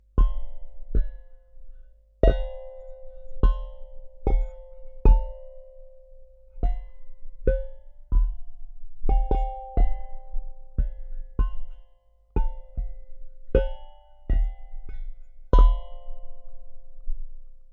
2023-01-07-childrens-toy-2x-contact-010
toy instrument recorded with contact microphones
soothing, hit, chord, asmr, music